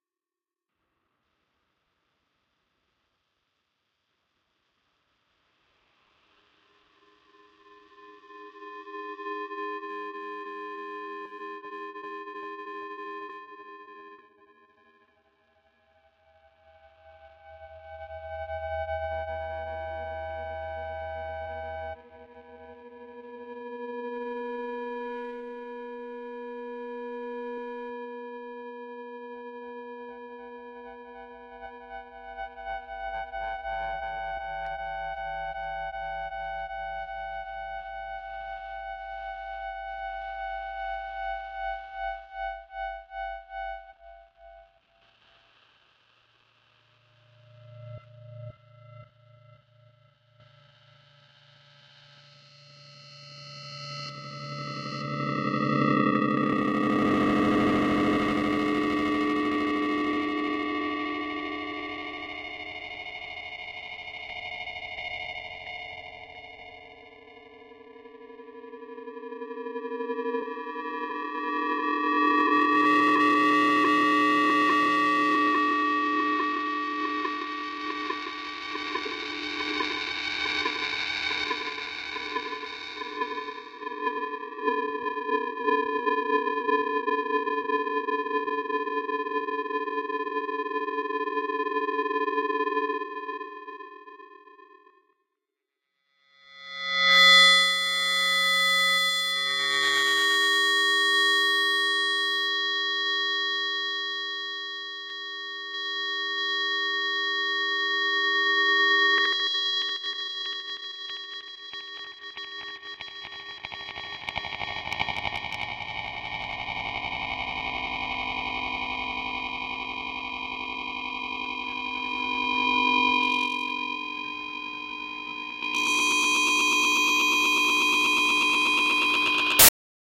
Digital Modulation and Feedback 03
Feedback and interferences for sound designers and sound artists
Experimentation, Feedback, Induction, Interference, Modulation, Noise, Sound-Design